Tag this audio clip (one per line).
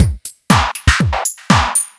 house
beat
punchy
loop
electro